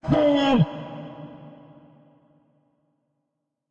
synthesized and processed my voice for this one again, i'd like to see how ya use it